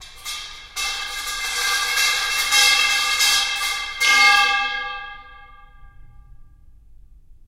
Metallic Pipe Rolling on Concrete in Basement
Rolling a metallic pipe on a concrete floor in a big room. Recorded in stereo with Zoom H4 and Rode NT4.
basement, concrete, echo, hall, iron, large, metal, metallic, pipe, piping, reverb, room, tube, tubular